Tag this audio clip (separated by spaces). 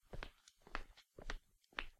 footsteps shoes tiles walk